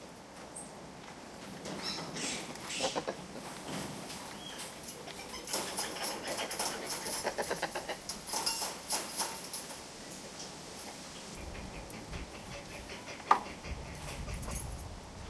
Spider monkeys playing in their cage. Lots of movement and some calls. Recorded with a Zoom H2.